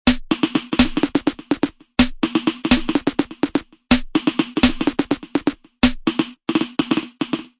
Wako Snares
Crazy Snare Play,Recorded at 101bpm.